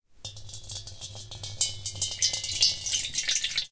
water poured into metal bowl
pouring water into a metal bowl
field-recording, metalic, sound-effect, water